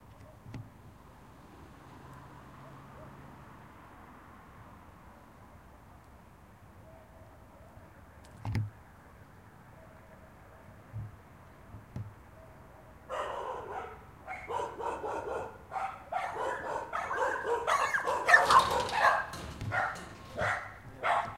Calle de noche en Santiago de Chile
Sound recorded on the street of Santiago de Chile at night
Calle, Chile, de, dr-40, Noche, Santiago, Tascam